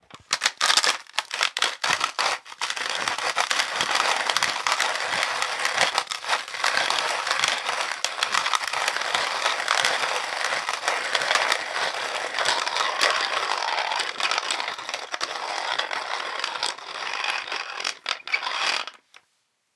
Coffee & Spice Grinder 1
A stereo recording of a hand cranked coffee and spice grinder grinding whole black peppercorns finely. The grinder is made of wood and steel with cast iron, serrated male and female conical grinding surfaces. Zoom H2 front on-board mics.
crushing, grinding, pepper, stereo, mill, xy